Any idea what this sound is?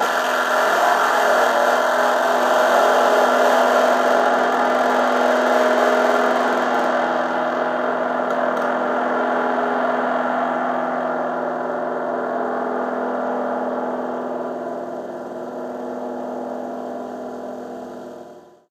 Electric guitar being routed from the output of a bass amp into a Danelectro "Honeytone" miniamp with maximum volume and distortion on both.
chords, distortion, electric, fuzz, guitar, overdrive, power-chord